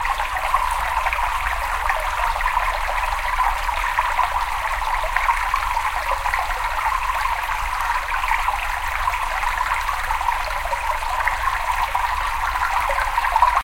Brook in cave
stream, cave, brook, flowing, flow, water